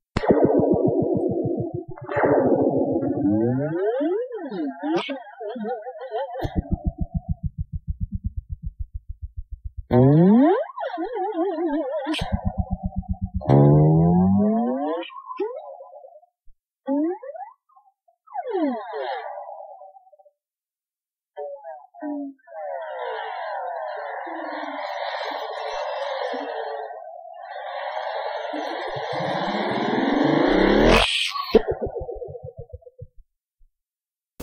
Wire-o-tron3
Some noises made by stretching 25 ft of steel wire between two chairs and striking, scraping, etc.
alien, cartoon, effects, fx, laser, sound-effects, sound-fx, space, star-trek